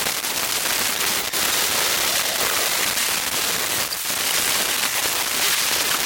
phone data loop
A looping sound of cellular data going to and from a cell phone. Recorded with an induction coil microphone.
induction-coil, loop